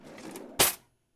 Cutlery Drawer Open
Kitchen cutlery drawer being opened. Recorded using a Sennheiser MKH416 and a Sound Devices 552.